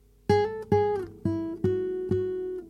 Few sounds and riffs recorded by me on Acoustic guitar